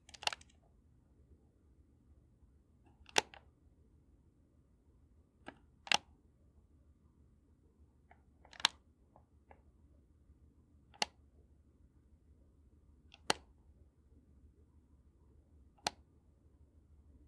Casio AS-51R Cassette Player BBS Switch
Recording of me flicking the Bass Boost System (BBS) switch on a Casio AS-51R Cassette Player.
Recorded With a Samsung Galaxy S21, edited with Audacity 2.4.2.
80s, AS-51R, Casio, Cassette, Click, Electronic, Handheld, Lofi, Machines, Mechanical, Plastic, Player, Retro, Snap, Switch, Tape